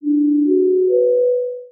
siren-01-dreamy-sensitiv
This one is the same as the 'dreamy' but deeper, if you think 'dreamy' too flat this one could be better for you. Play it, it's free.
This sound made with LMMS is good for short movies.
I hope you to enjoy this, if you need some variant I can make it for you, just ask me.
---------- TECHNICAL ----------
Vorbis comment COOL: This song has been made using Linux MultiMedia Studio
Common:
- Duration: 1 sec 718 ms
- MIME type: audio/vorbis
- Endianness: Little endian
Audio:
- Channel: stereo
warning, signal, feeling-bad, emergency, dreamy, siren, sensitiv, ambient, danger, deeper